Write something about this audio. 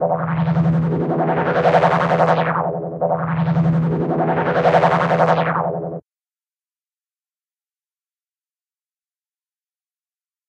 imaginary scape inside a spacecraft
space-ships, alien, sf, outerspace